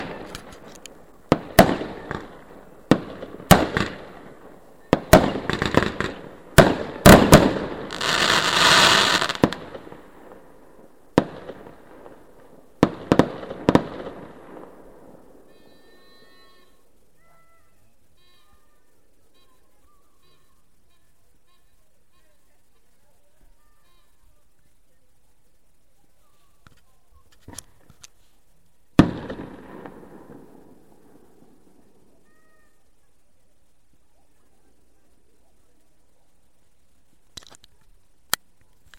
Recorded by my MP3 player. Rockets were launched from the edge of the street approx from 100 meters away.